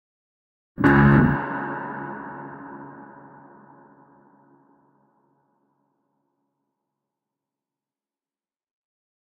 ae distGuitarStab
Recorded with lousy microphones, and added lots of reverb.
guitar, stereo, crunch, stab, reverb, distortion